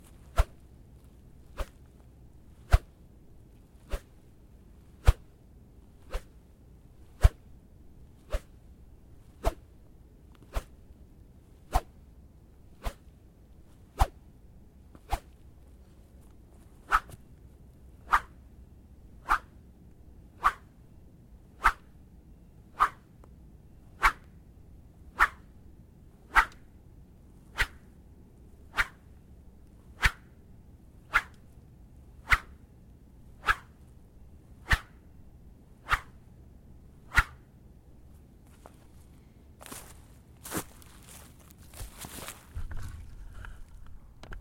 Series of whooshes recorded outdoors with two different small branches. The first, roughly half, is a deeper thumping whoosh and the latter half is a higher pitch one with a whine in some spots.